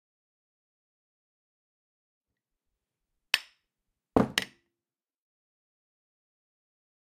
tapping with beer 1
Tapping with two beers.
Panska, Czech, PanskaCZ